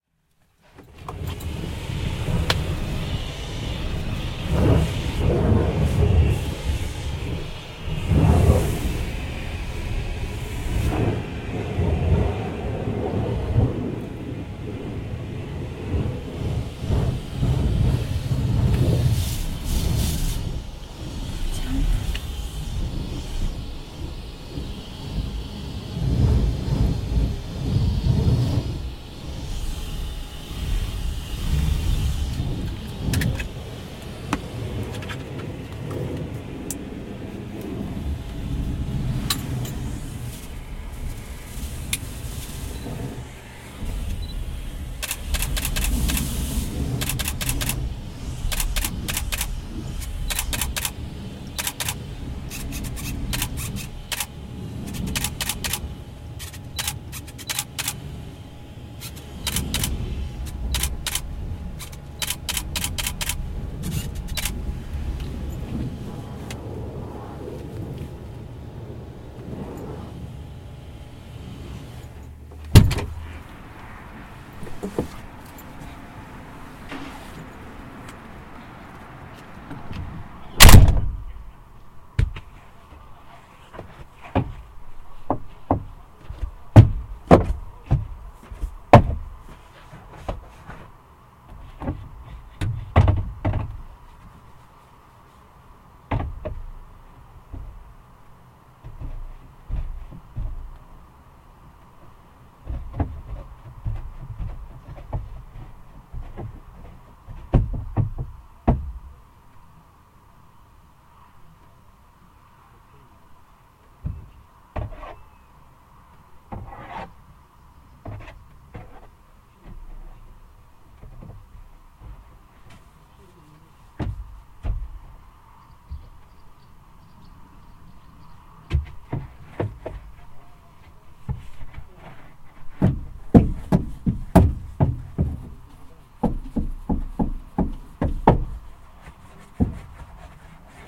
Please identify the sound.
19.07.12 mysterious feildrecording
19.07.2012: the old fieldrecording I found in my audio files. I don't remeber what it was and where exactly (for sure somewhere in Poznań). There is a lot of noise ans something really strange I can't recognize (somthing like typwriter or cashmaschine). This strange soundscape was recorded inside trhe car.
car, fieldrecording, hiss, hum, interior, machine, noise, Pozna, swooosh, wind